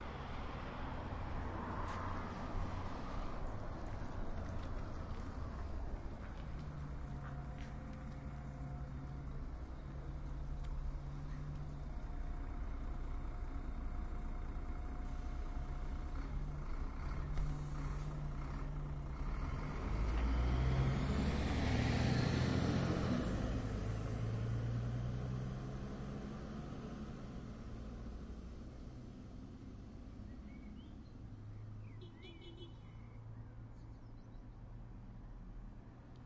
Bus closes doors and leave the place
City bus is coming, closes doors and leaving the place. Dry weather, spring time. Tuscam DR-05 stereo.
City, Road, Traffic, Passing, Transport, Cars